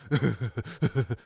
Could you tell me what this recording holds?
Uh huh huh - Weird Male Laugh
My buddy laughs all weird. Nothing clever to say here. It's just weird. Why is he laughing like that? Stop.